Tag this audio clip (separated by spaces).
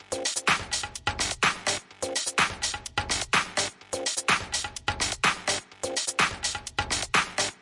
126-bpm dance drum-loop hihats house loop minimal-house patterns percussion percussion-loop